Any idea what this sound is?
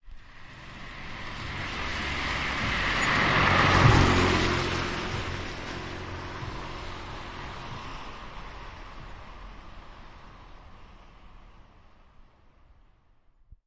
passing car
city, traffic, road, passing, cars, street, field-recording, car, driving